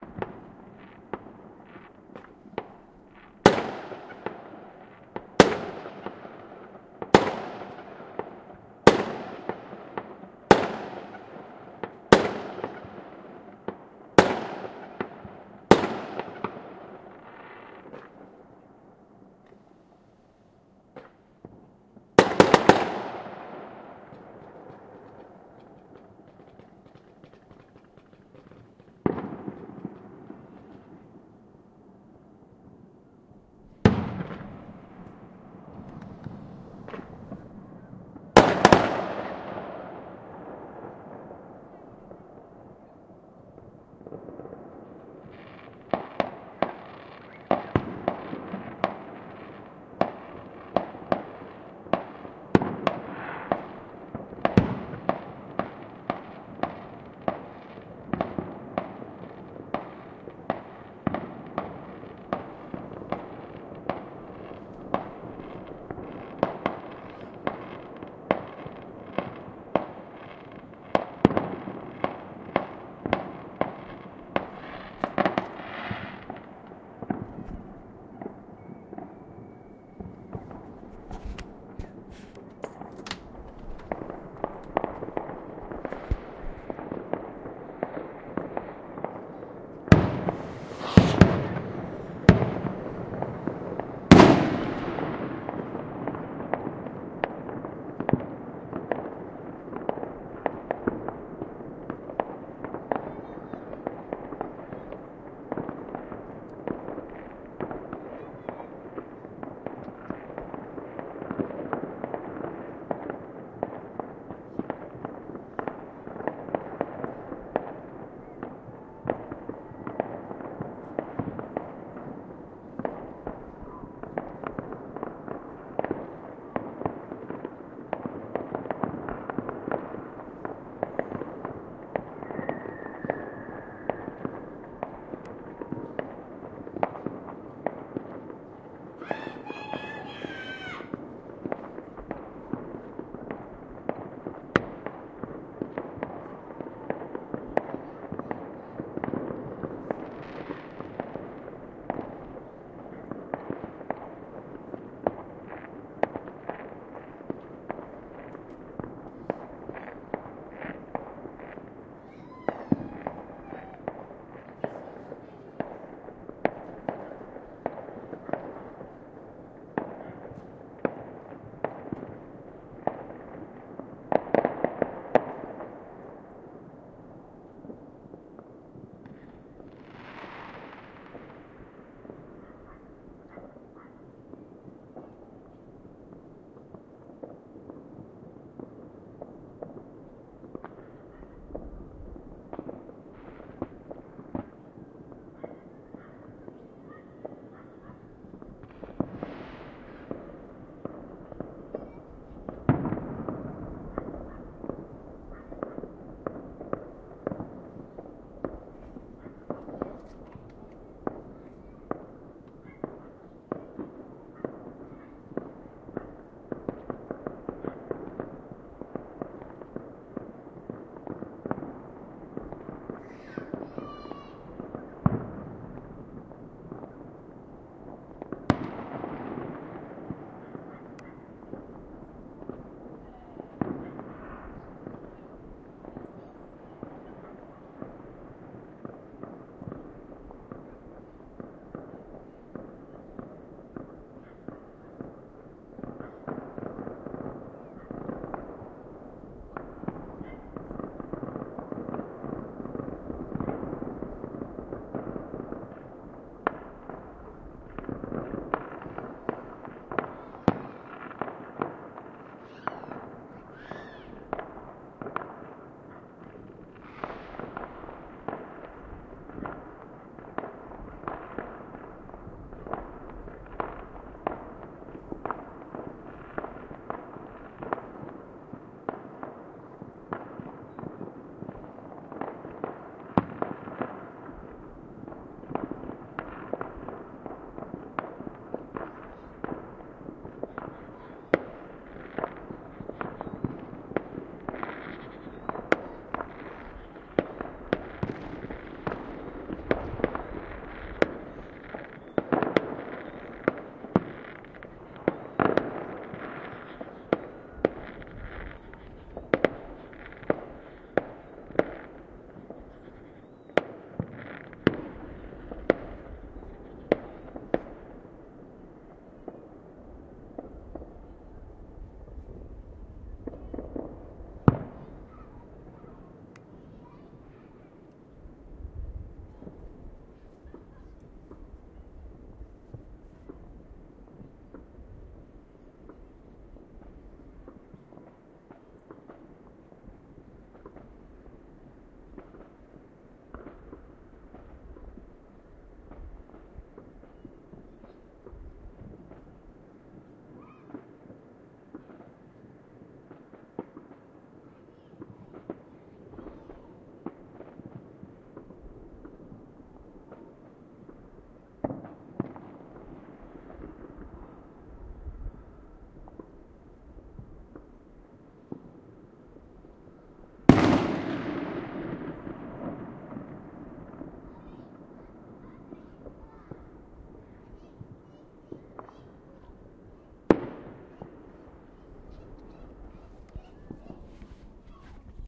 Yet More Fireworks
Recorded from the window of my attic flat in Walkley Bank, Sheffield. This is the moment of New Year's Day 2021. You can hear a small amount of children in the background and one child suddenly shouting "Happy New Year" and scaring the bejesus out of me at about 3 minutes. I change position at 1 minute 30 (pretty much climbing entirely out of the window) and you get a much more impressive surround sound feel after that. There's also a huge explosion right at the end that is definitely worth waiting for.
Fireworks, Explosions, New-Year, Bonfire-Night, July-4th